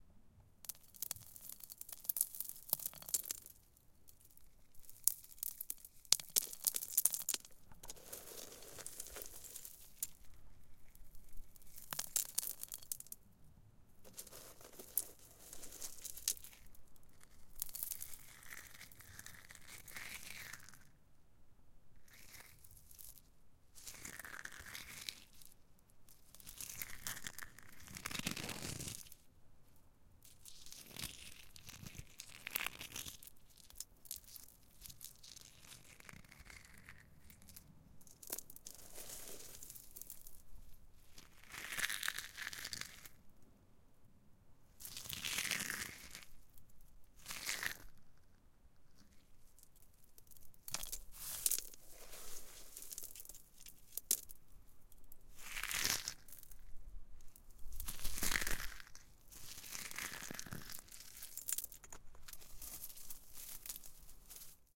Gravel-shell sand gritty 090714
Recording of grinding shell sand. Tascam DR-100.
crunch, field-recording, fx, gravel, gritty